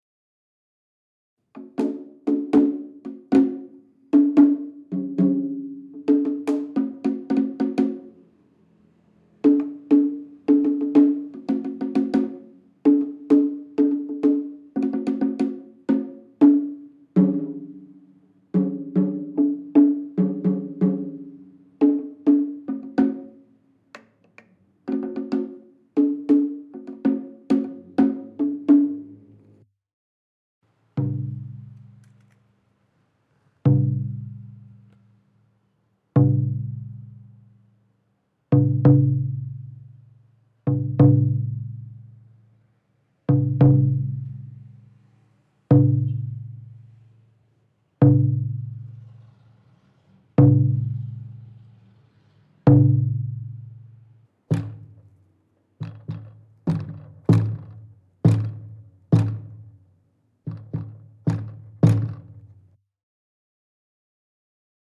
Tokyo - Percussion 5
Playing several drums in a Tokyo drum museum. Some rhythms and single hits. Recorded on a Zoom H4 in May 2008. Light eq and compression added in Ableton Live.
japan, percussion